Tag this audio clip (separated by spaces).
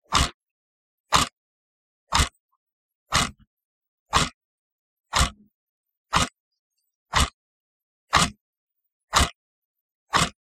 sound Clock Tick